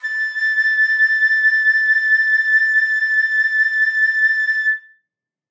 One-shot from Versilian Studios Chamber Orchestra 2: Community Edition sampling project.
Instrument family: Woodwinds
Instrument: Flute
Articulation: vibrato sustain
Note: A6
Midi note: 93
Midi velocity (center): 63
Microphone: 2x Rode NT1-A spaced pair
Performer: Linda Dallimore